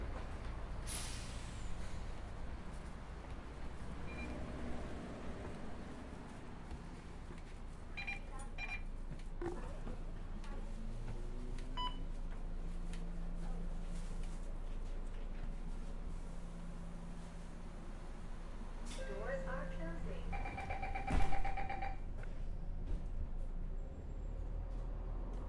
Bus ride ambience singapore door open bus buzzer
Zoom H4N